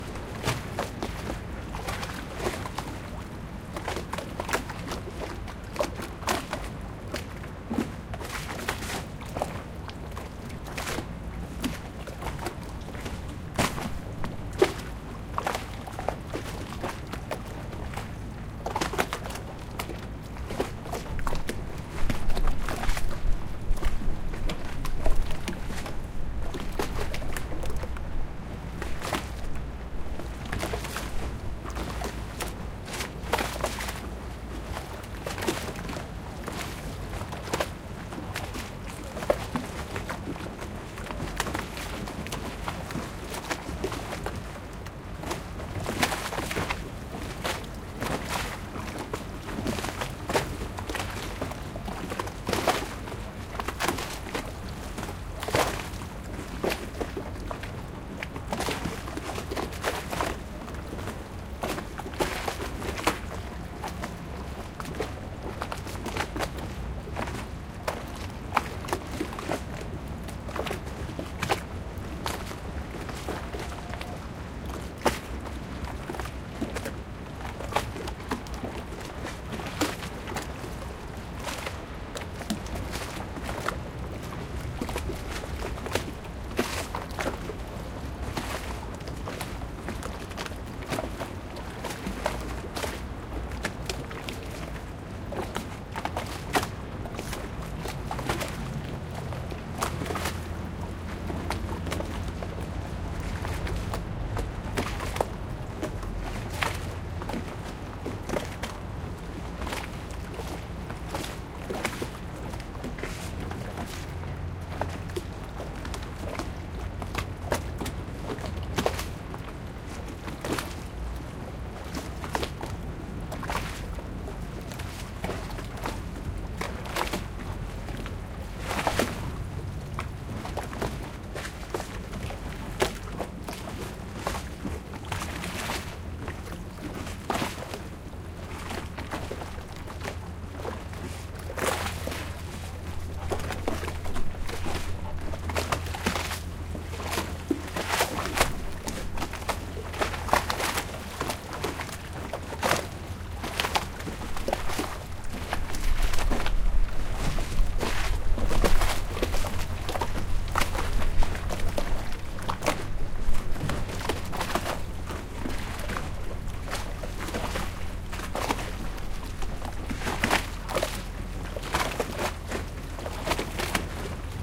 Parked Gondolas - Venice, Italy
Splashing sound of parked public gondolas on Grand Canal next to Punta della Dogana.
boat, field-recording, gondola, splash, venice, water